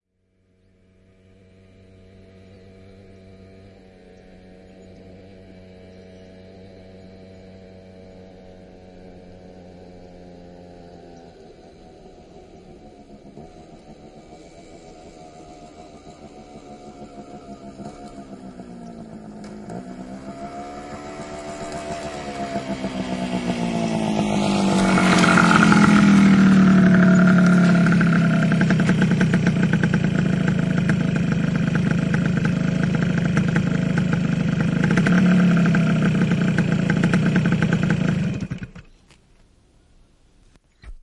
Moottoripyörä, tulo asfaltilla / A motorbike, approaching on asphalt, stopping, idling, shutting down, Yamaha 125 cm3
Yamaha 125 cm3. Lähestyy, pysähtyy, tyhjäkäyntiä, moottori sammuu.
Paikka/Place: Suomi / Finland / Nummela
Aika/Date: 05.10.1975
Field-Recording, Finland, Finnish-Broadcasting-Company, Motorbikes, Motorcycling, Soundfx, Suomi, Tehosteet, Yle, Yleisradio